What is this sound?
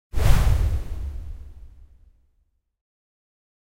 Woosh Low 03
White noise soundeffect from my Wooshes Pack. Useful for motion graphic animations.
swoosh, whoosh, soundeffect, swish, effect, sfx, fly, wind, woosh, space, wish, swash, scifi, swosh, transition, future, wave, fx, noise